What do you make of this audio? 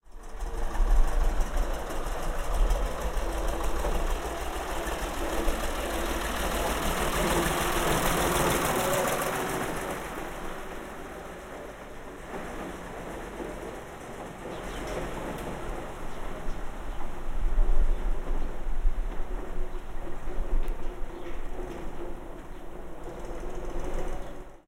18092014 grodziszcze passingby tractor
Fieldrecording made during field pilot reseach (Moving modernization
project conducted in the Department of Ethnology and Cultural
Anthropology at Adam Mickiewicz University in Poznan by Agata Stanisz and Waldemar Kuligowski). Sound of a passing by tractor in Grodziszcze village. Recordist: Ada Siebers. Editor: Agata Stanisz
fieldrecording, grodziszcze, lubusz, poland, road, swiebodzin, tractor